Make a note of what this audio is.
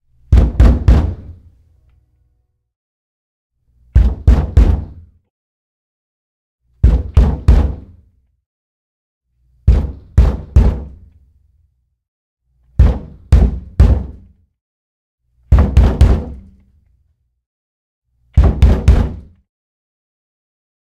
door wood bang on aggressively various